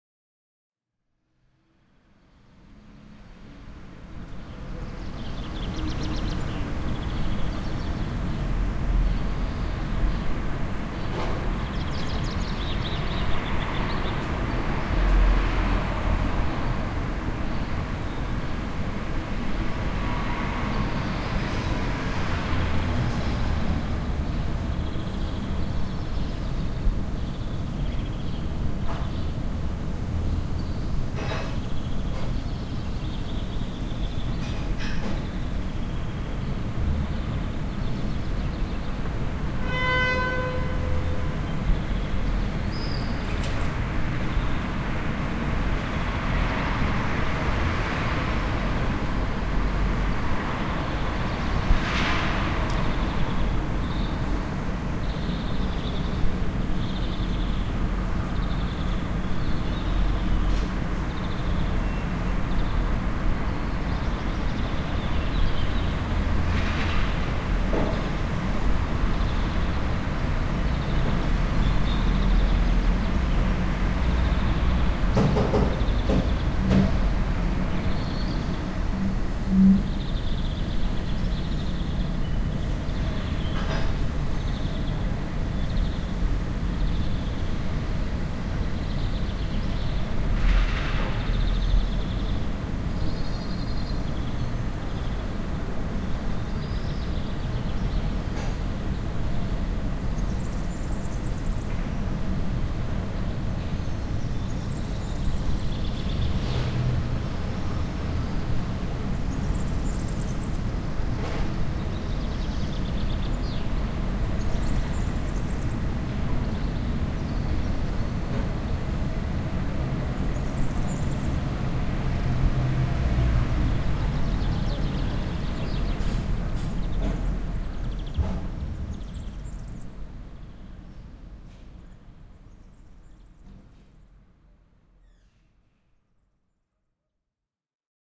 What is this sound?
date: 2010, 03th Jun.
time: 03:00 PM
place: via Rockfeller (Sassari, Italy)
description: Soundscape recorded during "Terra Fertile" electroacoustic italian festival at our B&B; in the outskirt.